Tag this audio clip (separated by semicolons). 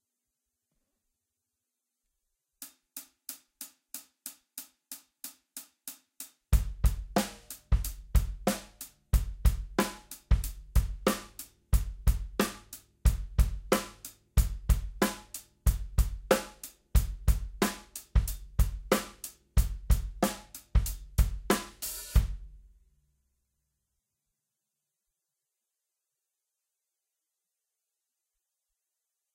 92-bpm; beat; drum; drums; hiphop; loop; percs